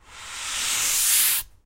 Paper sliding across table